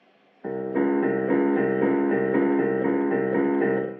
Scary Piano
Closer, Scary, Haunted, Horror, piano, Old, Creepy, Getting